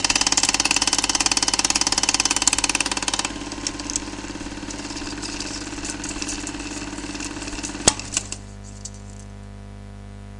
S8 flushing cinema projector
projector, super8, cinema